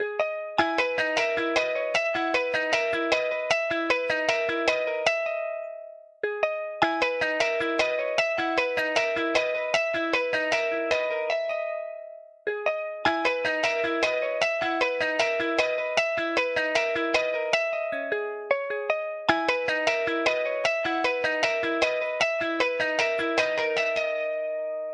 electric child
accrued when changing the actual patch of a midi-loop into a chord based patch.
electric, electric-guitar, music-box